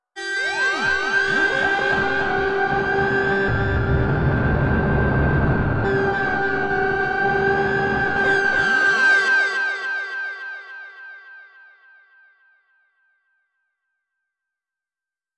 Sci Fi Growl Scream G
A strong, aggressive electronic growl/scream.
Played on a G note.
Created with Reaktor 6.
abstract, apocalypse, computer, digital, effect, electric, electronic, freaky, future, fx, glitch, growl, noise, sci-fi, scream, sfx, sound-design, sounddesign, soundeffect, space, strange, torment, tortured, weird